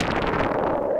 sherman shot bomb31
I did some experimental jam with a Sherman Filterbank 2. I had a constant (sine wave i think) signal going into 'signal in' an a percussive sound into 'FM'. Than cutting, cuttin, cuttin...
perc, shot, percussion, filterbank, analouge, artificial, deep, bomb, massive, analog, blast, harsh, sherman, hard